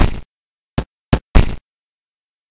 Piggy Bank 0bject count3
~ A fake little baby piggy bank shaking LOOP HITS!
synthesized, electric